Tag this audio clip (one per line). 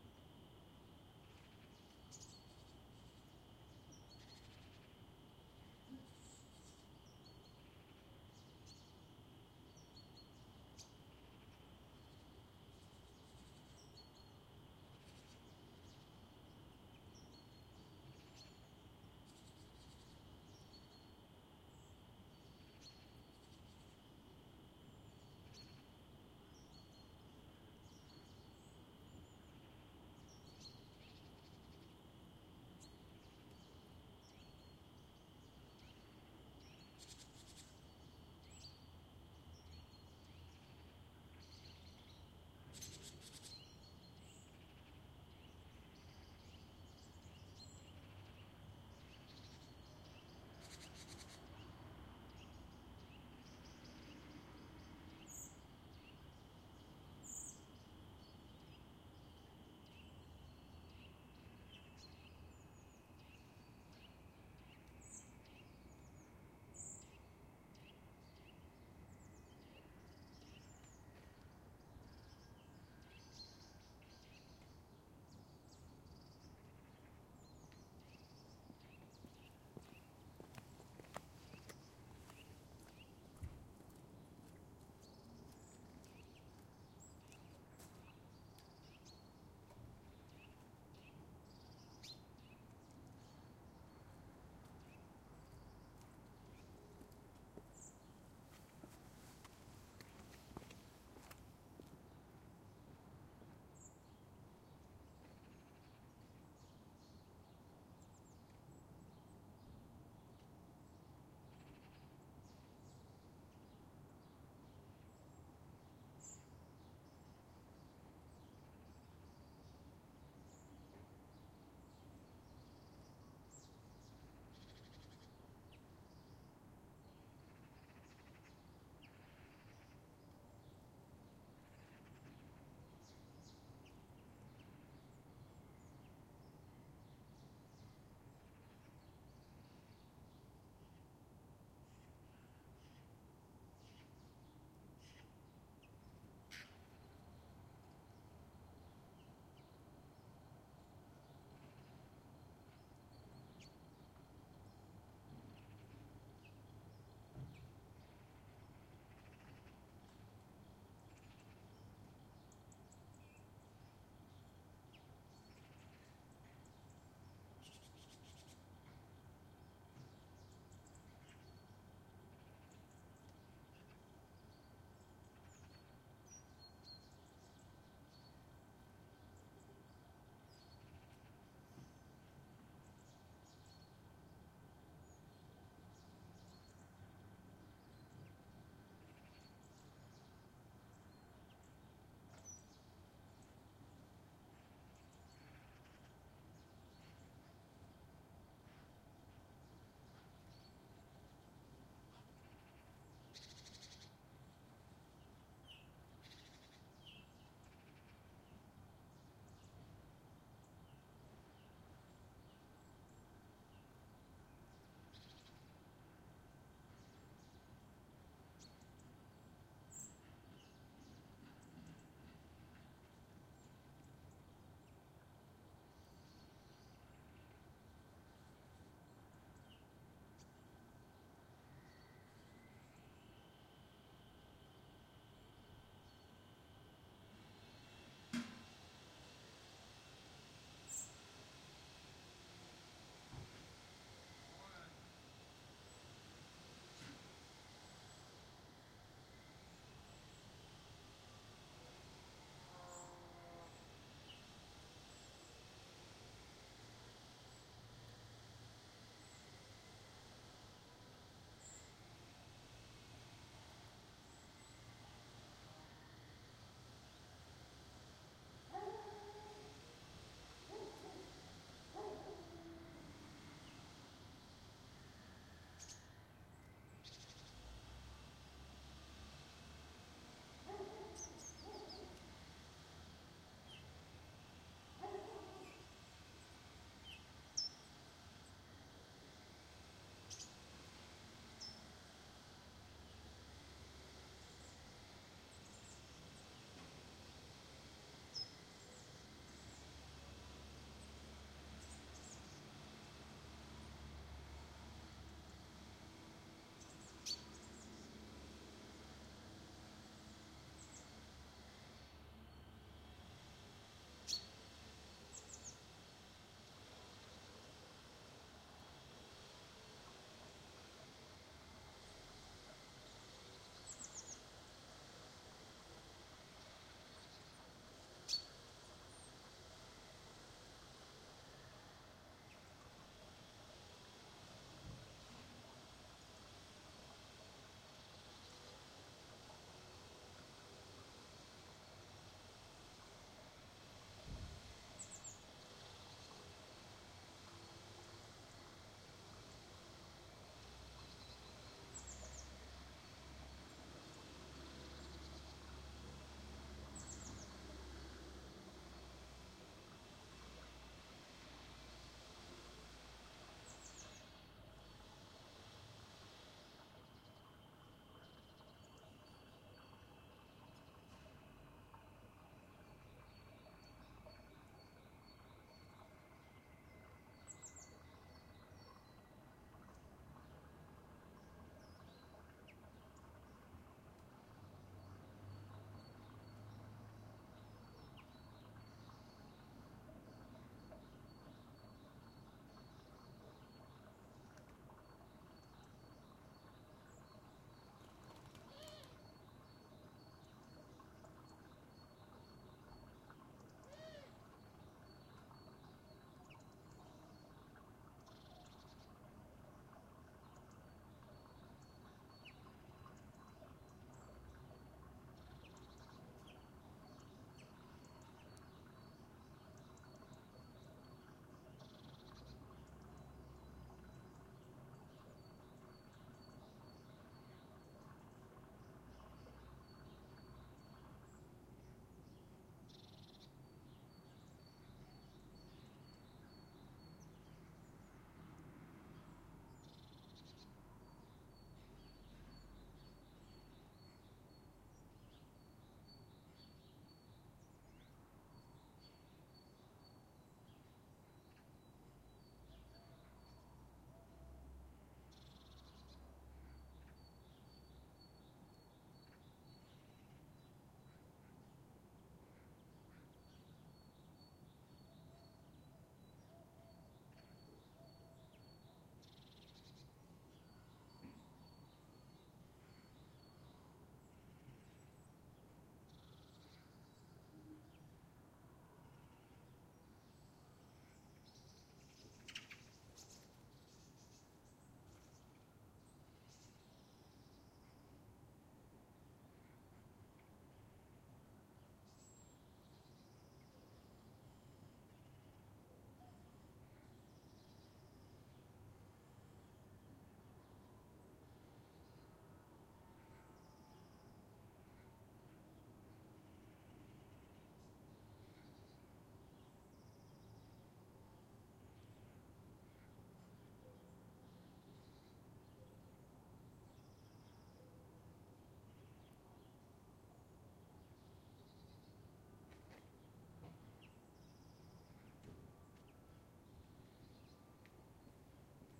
calm
Ambient
surround
stereo
little
traffic
pair
Rear
City